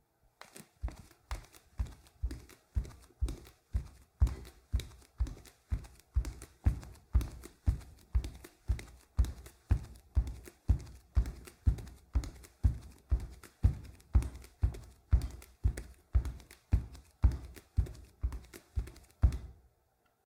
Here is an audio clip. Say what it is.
01-28 Footsteps, Wood, Barefoot, Medium Pace
Walking barefoot on a wood floor, medium pace
wood, footsteps, hardwood, barefoot, running, walking